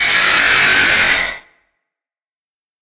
I made a jumpscare out of this file.
I put a few filters on it and made it sound more FNAF like so if you like that or if you need a robotic jumpscare sound (meaning tiny characters obviously) then this can work I guess.
fnaf, horror, jumpscare, monster, robotic, scary, scream, screaming, screams